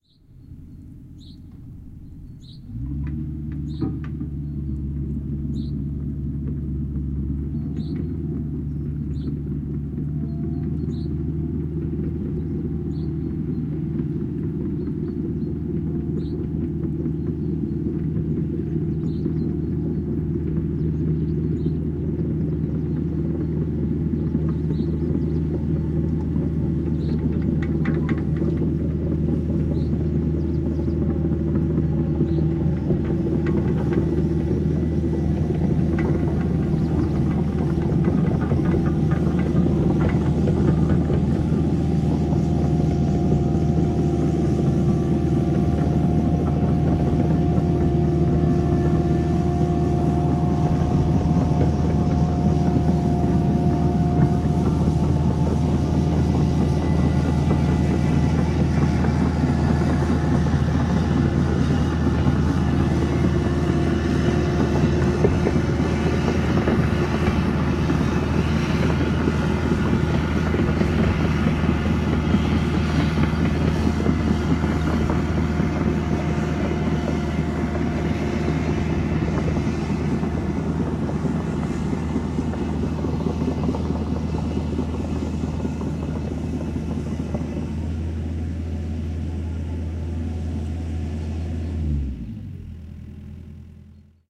Excavator Right To Left Short
A stereo field-recording of a steel tracked 6 ton excavator traveling from right to left across grassland.Rode NT-4 > Fel battery pre-amp > Zoom H2 line-in.
clank, digger, xy, tracks, excavator, clunk, stereo, field-recording, machine, diesel, machinery